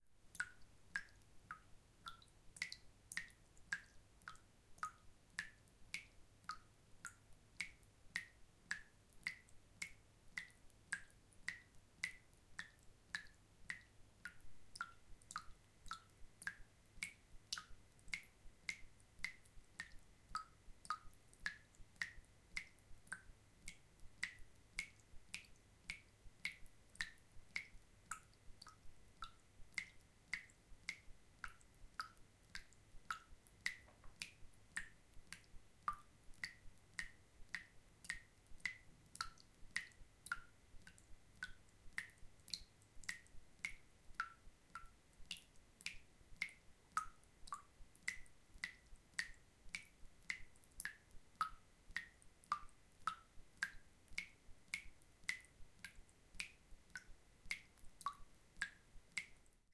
Raw audio of fast dripping in a sink.
An example of how you might credit is by putting this in the description/credits:
And for more awesome sounds, do please check out my sound libraries or SFX store.
The sound was recorded using a "H1 Zoom recorder" on 5th April 2016.
Random Trivia: This "Dripping" pack marks the anniversary of my first uploaded sound.